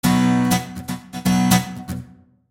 Rhythmguitar Bmaj P103
Pure rhythmguitar acid-loop at 120 BPM
120-bpm, loop, guitar, rhythmguitar, acid, rhythm